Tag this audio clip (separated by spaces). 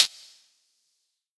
Kit Hard Original